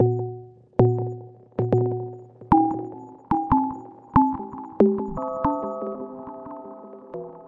bass space 007 blipppad
bleeps and bloops made with reaktor and ableton live, many variatons, to be used in motion pictures or deep experimental music.